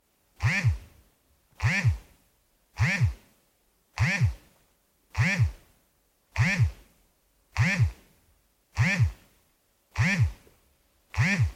Cellphone / mobile phone vibrating in pants
Model: Huawei Y6
Recorded in studio with Sennheiser MKH416 through Sound Devices 722
Check out the whole pack for different vibration lengths!